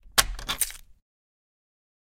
ammo reload
The sound of a gun being reloaded.
ammo, gun, reload